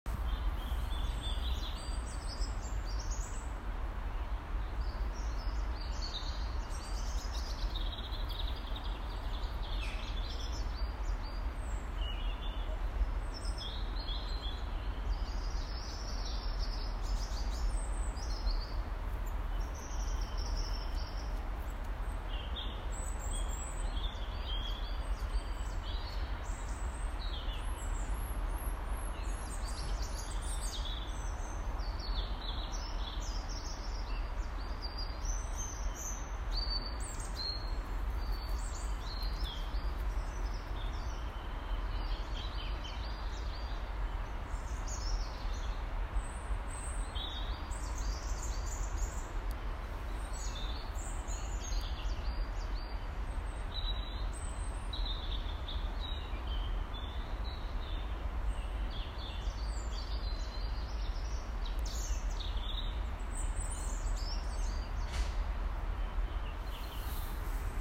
Bird’s dawn chorus on a cold January morning
Quiet hum of road in the background